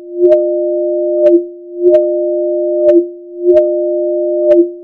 Facility Breach Siren 1
Quick! The plutonium reactor core is melting! Sound the alarm! With the push of a button a booming siren echoes through Area 51. If this describes your sound needs, you've found the perfect sound!
sci-fi, nuclear, siren, science-fiction, warning, emergency, factory, alert, sirens, alarm, alien, scp, tornado, base, facility, industrial, atomic, evacuate, foundation, disaster, air-raid, meltdown, Area-51